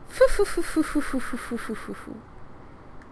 Fufufufu laugh
Just something to show someone what the laugh Fufufu might sound like.
fufufu, request, laugh